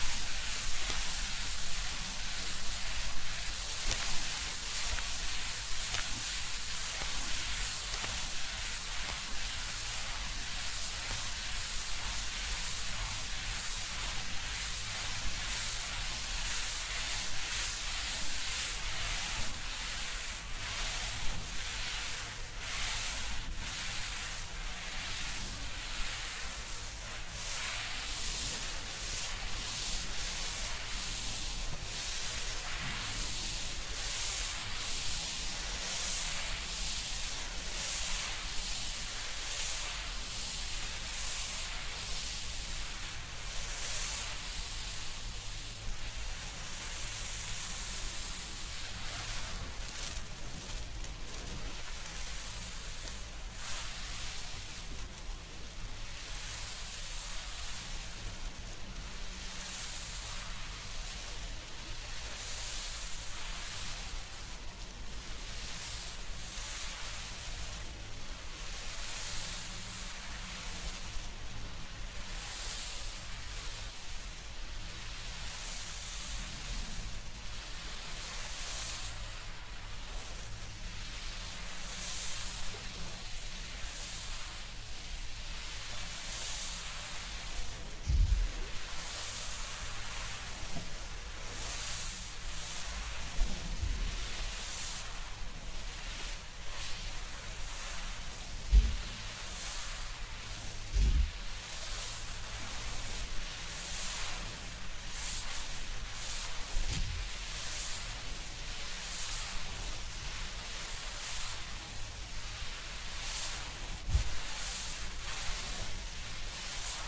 Foley effect with the purpose of simulating smoke
Foley, Effects, Smoke